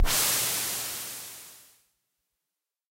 EH CRASH DRUM102
electro harmonix crash drum